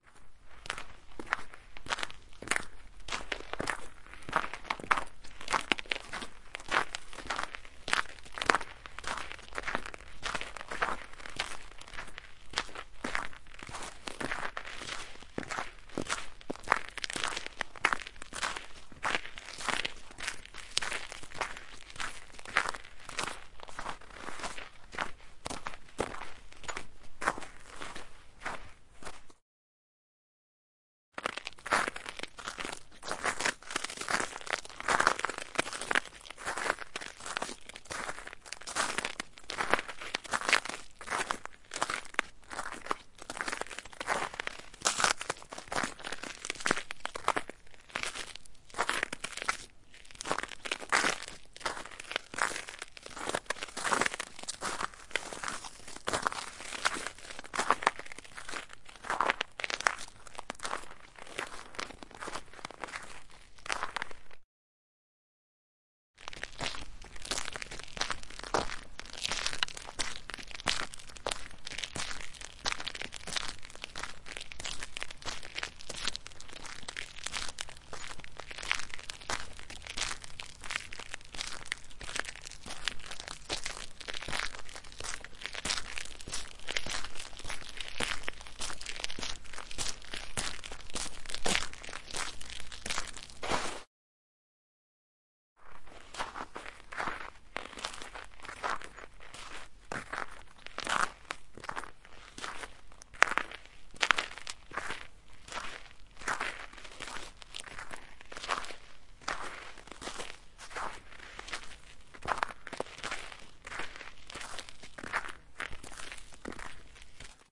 Ice walk.
4 types of walking on the icy asphalt road.
Temperature: - 4 ° C.
Recorder - Tascam DR-05.
asphalt, icy, road, walk, walking, winter